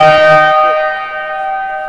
bozina gigante
Bozina de un pavellon deportivo
bozina, deportes